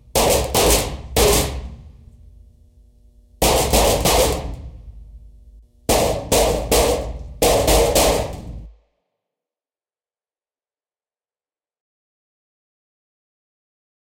bullets hit EDIT
This is the sound of bullets hitting metal. The sound was originally used to represent a bullet hitting an airplane.
ammunition, gun, hitting, metal, bullets